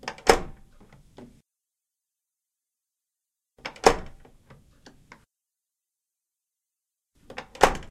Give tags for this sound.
Essen Germany January2013 SonicSnaps

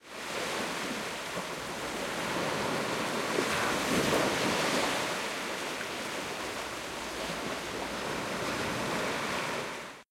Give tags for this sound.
sand water wave waves sea nature shore coast seaside wind beach outdoor normandy france oceanside ocean westcoast

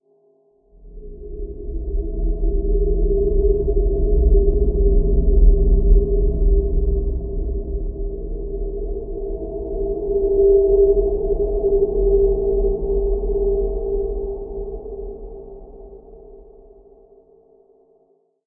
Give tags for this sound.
soundscape pad drone space artificial multisample

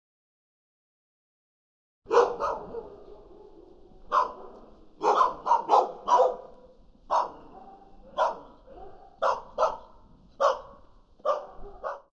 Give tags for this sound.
Animal Cz Czech Dogs Panska

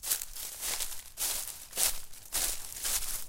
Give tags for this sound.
crinkle walk foley leaves step

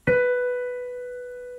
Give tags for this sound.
B Piano Si

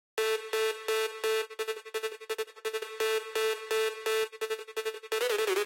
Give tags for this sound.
Hardcore Lead Powerstomp Sample Loop 170-BPM E-Major UK-Hardcore

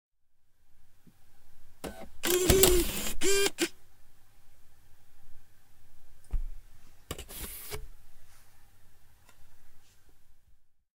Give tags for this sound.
cd disc disk dvd machine mechanical robot

